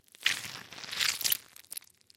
rubber anti stress ball being squished
recorded with Rode NT1a and Sound Devices MixPre6